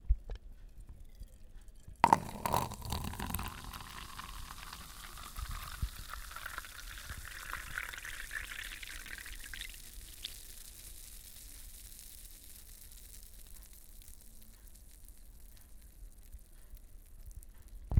Pouring coca-cola from a soda can into a paper cup.
Recorded with Zoom H1